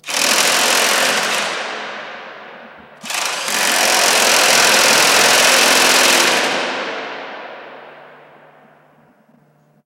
Very loud clattering in large reverberant dome as clamp is applied to
stabilize the 40-inch refracting telescope at Yerkes Observatory.
Recorded with mini-DV camcorder and Sennheiser MKE 300 directional electret condenser mic.